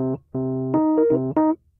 rhodes noodle 1
Strange little ditty played on a 1977 Rhodes MK1 recorded direct into Focusrite interface. Has a bit of a 1970's vibe to it.
chord, drama, electric-piano, electroacoustic, keyboard, mysterious, rhodes, vintage